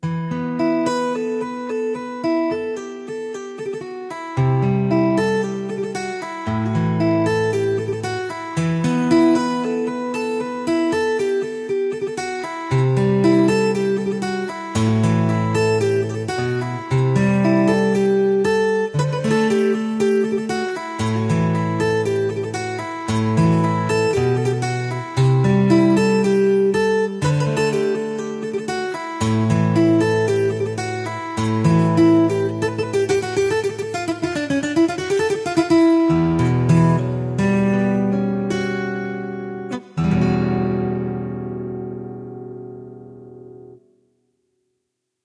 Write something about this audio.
Folk ballad guitar improv.
Playing Vst guitar on my keyboard in Balkan style.
acoustic, balkan, ballad, chords, clean, folk, guitar, improvisation, loop, mellow, melody, music, playing, vst